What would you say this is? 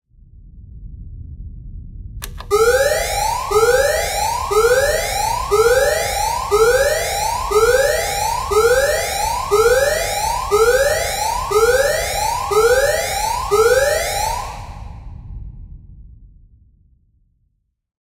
The spaceship's emergency alarm being triggered.
alarm, button, emergency, science-fiction, sci-fi, technology